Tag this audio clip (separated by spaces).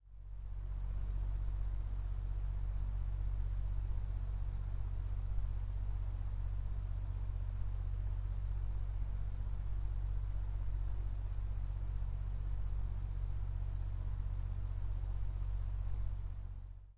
air hum bass fan low-frequency drone low noise